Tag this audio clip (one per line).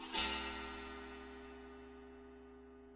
sample
scrape
scraped
china-cymbal